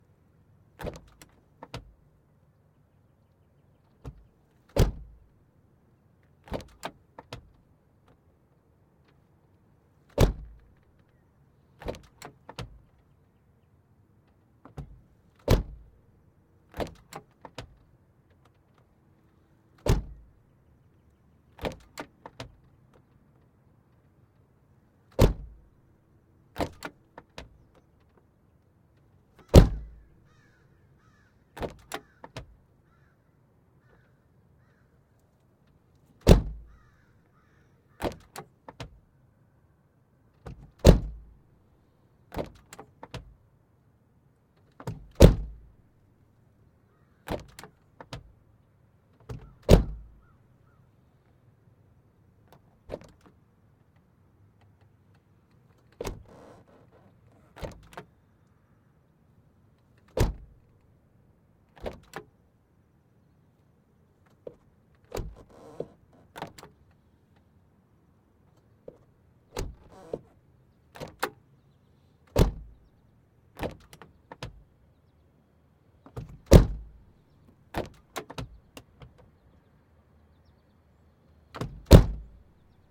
Clip featuring a Mercedes-Benz 190E-16V trunk being opened and closed. Recorded with a Rode NTG2 outside the door by about 1 foot.
benz, car, door, dynamometer, dyno, engine, mercedes, slam, vehicle, vroom